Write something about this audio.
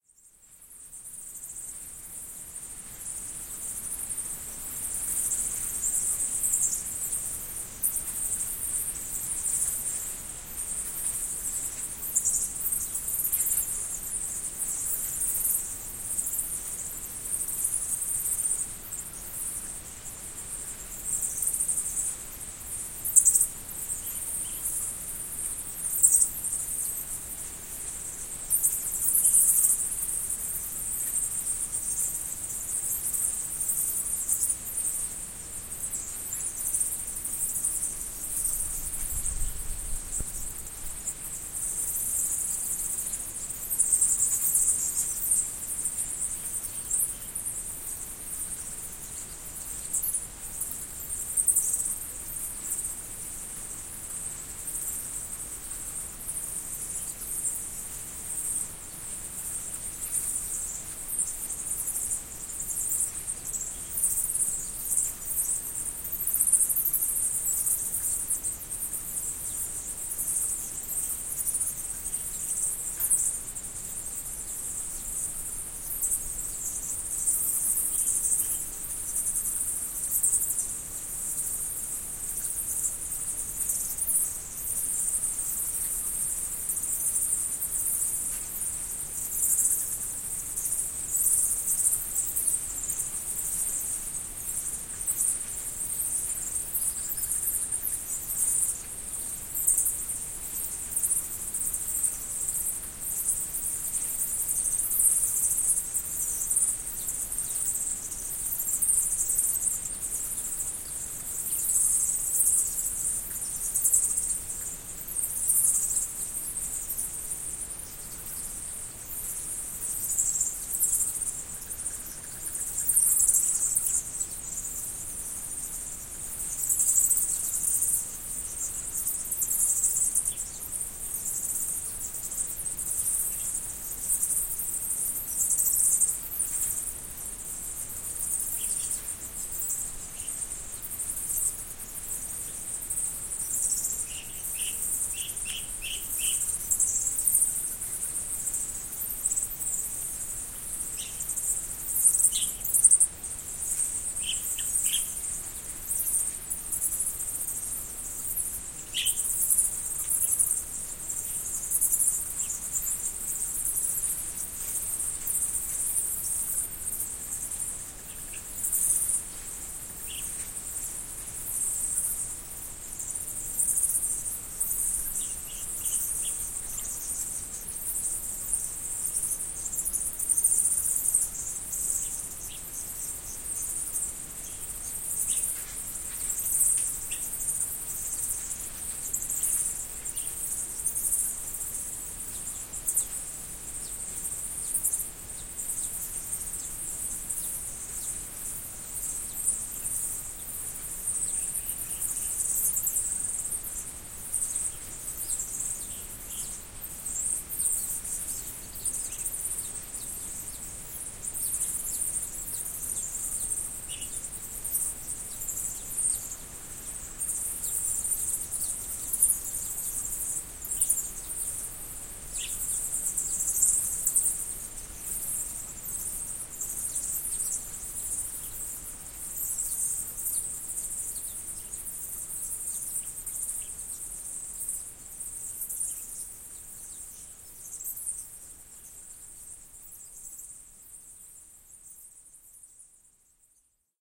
this track has been recorded on top of phnom krom at the souther end of siem reap on the 24th of november 2016 at a quater to four in the afternoon. the recording consists primarily of the sounds emitted by a bat colony inhabiting the masonry of the central prasat of the ancient khmer temple complex built on phnom krom. interestingly, the are masses of dragonflies and butterflies swarming around this exact part of the building as well. furthermore you can heare some birds making themselves known along the way and palm fruit tree leaves fluttering in the wind. enjoy!
the recording equipment for this session consisted of a roland R-05 solid states field recorder plus a roland CS-15S stereo mic.